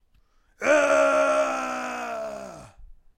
vocals frustrated yell
Male voice yelling in frustration
male, shouting, yelling